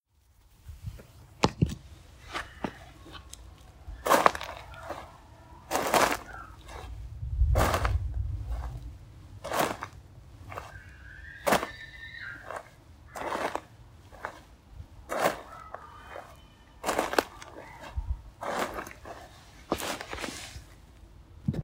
footsteps on gravel